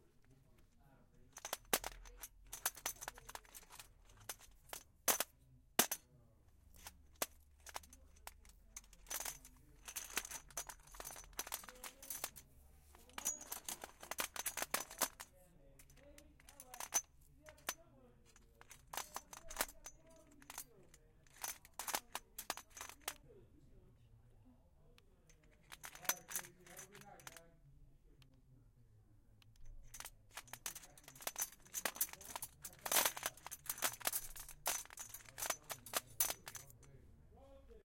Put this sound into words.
heavy metal parts tumbling around in a plastic box
one in a series of field recordings from a hardware store (ACE in palo alto). taken with a tascam DR-05.
hardware, hardware-store, hit, many-of-the-same-things, metal, plastic, steel, tumble